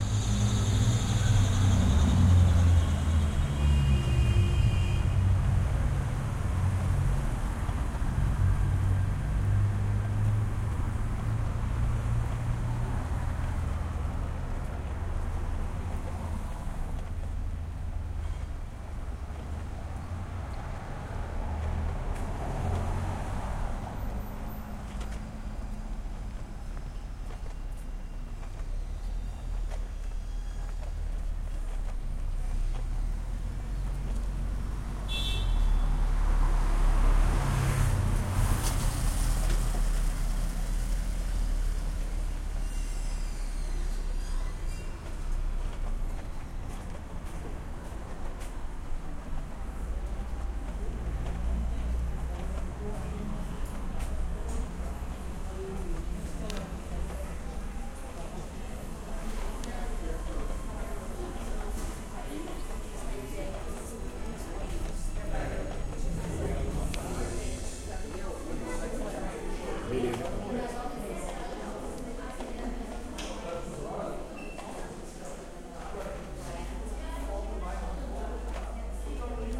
Walking on street with construction + Entering commercial building (Sao Paulo)
Walking on street with some construction going on and entering a commercial building. Recorded with Tascam DR-03